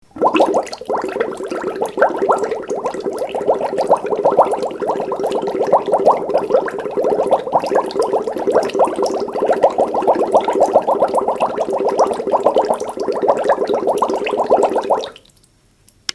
Bubbles, Medium, A
Raw audio of bubbles produced by blowing into a straw in a water-filled sink. In this recording, the sink is half full to produce moderately sounding bubbles.
An example of how you might credit is by putting this in the description/credits:
Straw, Bubble, Sink, Medium, Water, Bubbles